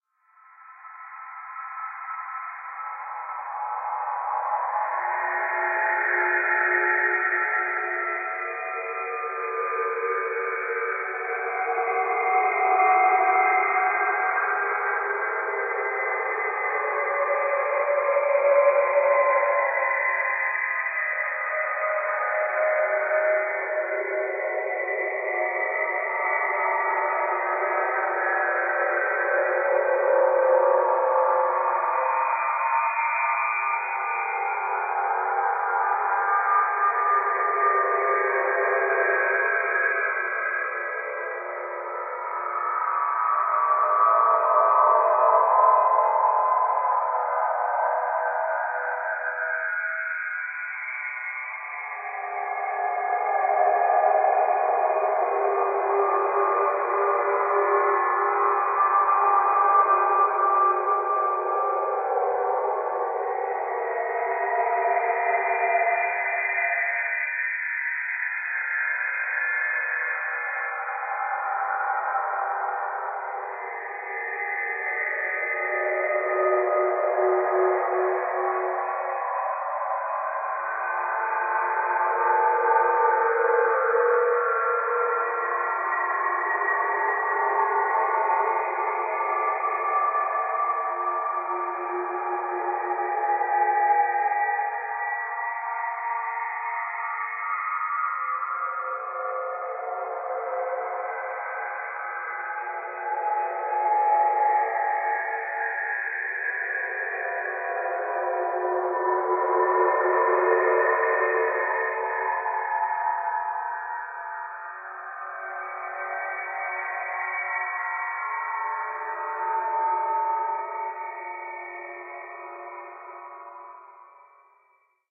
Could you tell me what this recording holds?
Deep Space
Long, anguishing, hypnotic sound from very deep outer space. Sample generated via computer synthesis.
Alien, Effect, FX, Game-Creation, Outer, Outer-Space, Scary, Space, Stars, Starship, Warp